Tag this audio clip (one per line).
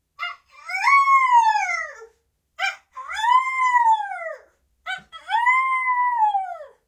human
fake